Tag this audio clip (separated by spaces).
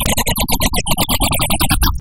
effect electronic weird